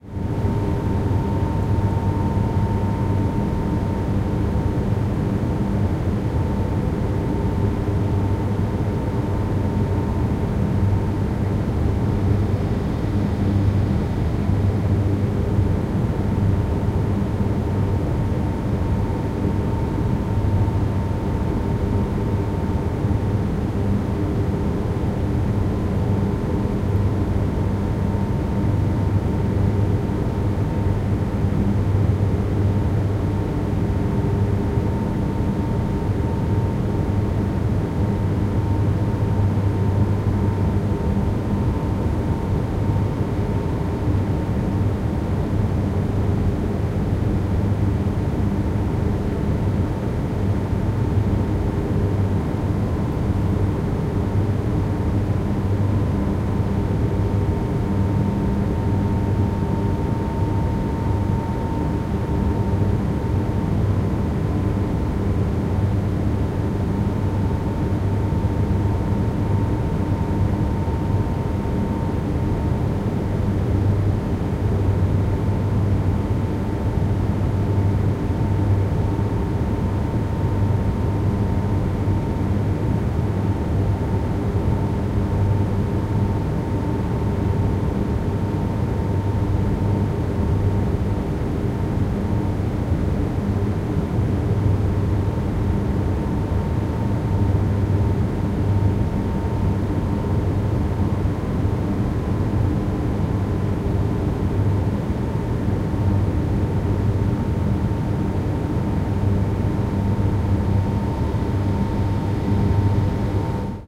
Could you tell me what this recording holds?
Roomtone ParkingGarage Zwolle Ground Front
Front recording of surround room tone recording.
roomtone, sound, sounddesign, surround